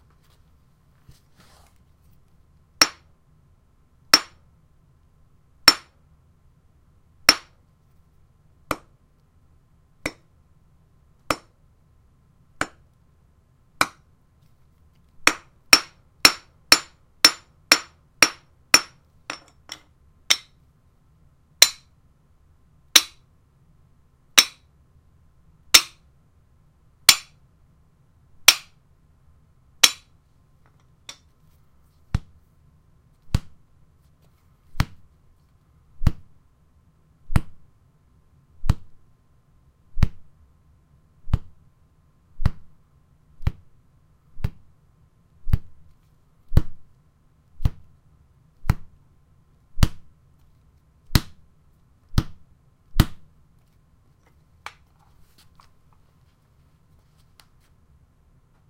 Hitting a concrete floor with a small claw hammer and a rubber mallet. (Suggested use: cut and paste sounds you like and create a beat)